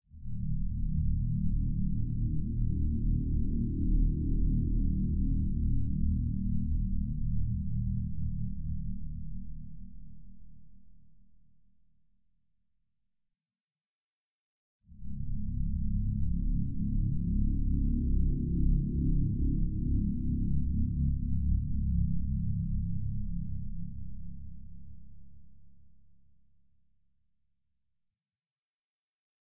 Deep bass in E♭ and D♭ - 130bpm
Noise heavily processed with chorus and delay. Slight LFO to LP filter cutoff. Recorded at 130bpm.
130bpm, bass, digital